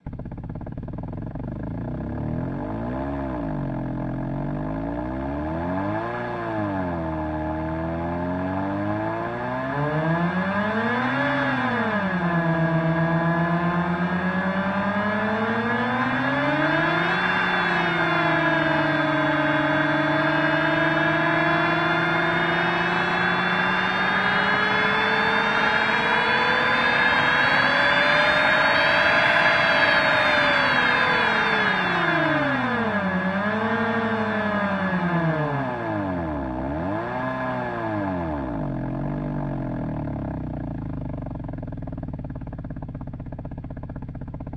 My first attempt to create engine noises. This is more of a sport car engine. Created with the help of Xoxos plugin Virtual Machine.
virtual-machine, noise, rev, engine, xoxos, acceleration